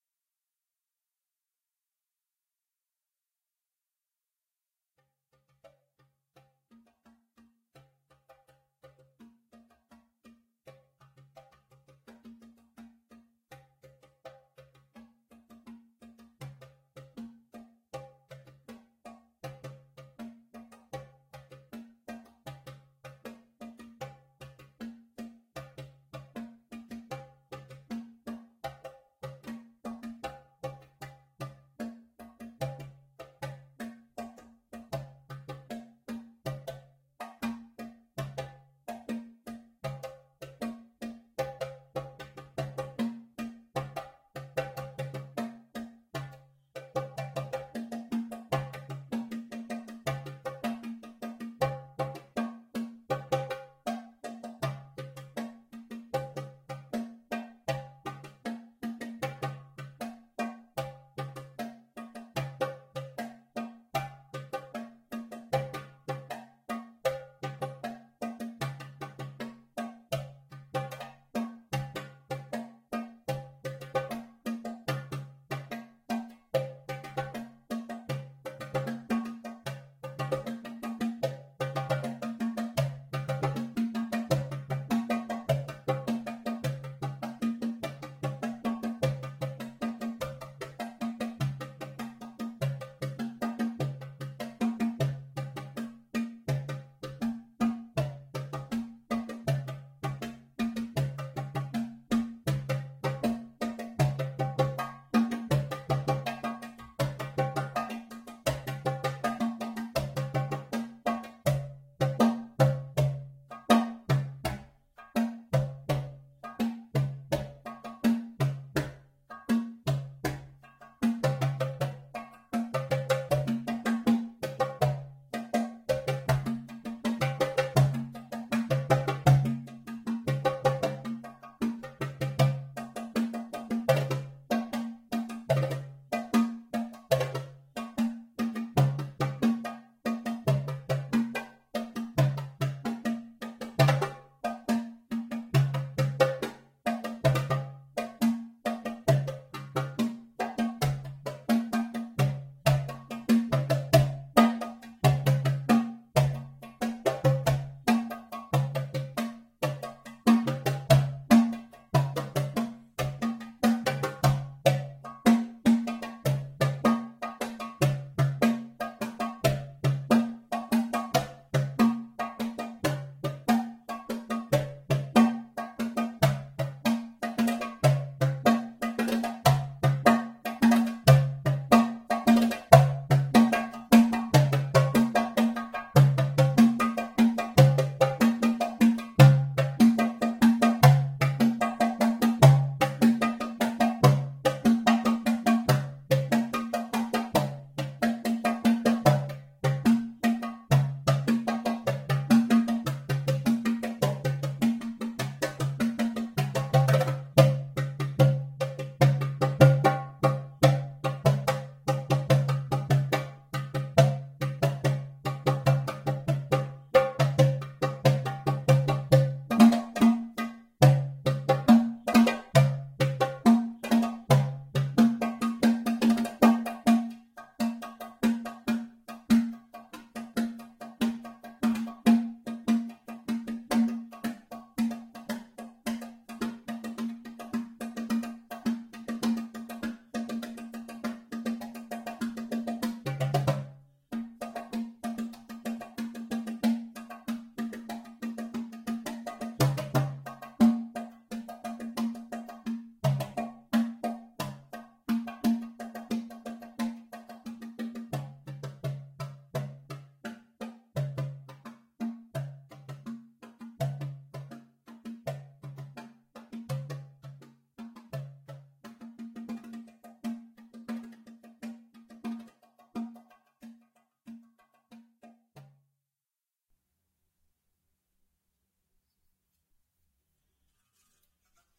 Two Drum Improv 1 Jan 2019 2
A further re-working of the two-drum beat track.
beat, djembe, drum, groove, multitrack, rhythm